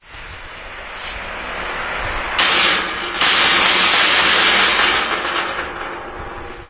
The gate is shutting with a rumble. 20.08.09: the apartment of flat from the 40s of the XX Cent. Ogrodowa street in Poznan.
clang, gate, rumble, street